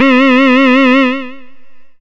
Basic pulse wave 1 C4
This sample is part of the "Basic pulse wave 1" sample pack. It is a
multisample to import into your favorite sampler. It is a basic pulse
waveform with a little LFO
on the pitch. There is a little bit of low pass filtering on the sound,
so the high frequencies are not very prominent. In the sample pack
there are 16 samples evenly spread across 5 octaves (C1 till C6). The
note in the sample name (C, E or G#) does indicate the pitch of the
sound. The sound was created with a Theremin emulation ensemble from
the user library of Reaktor. After that normalizing and fades were applied within Cubase SX.
basic-waveform, reaktor, multisample, pulse